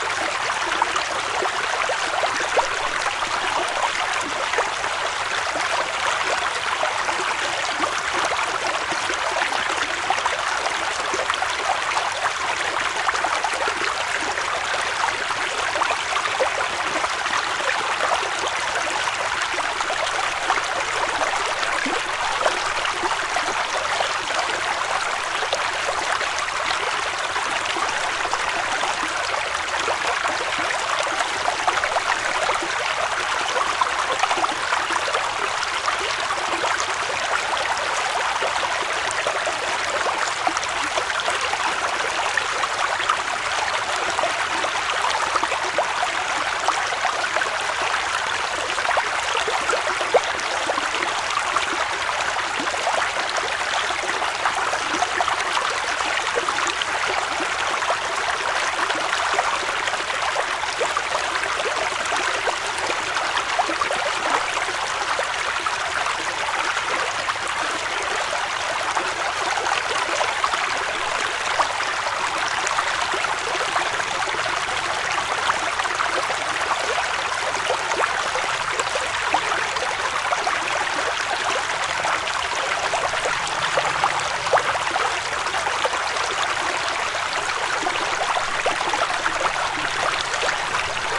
Mountain Stream 2
A loopable stereo field-recording of a mountain stream, no birds or other sounds.. Rode NT-4 > FEL battery pre-amp > Zoom H2 line in.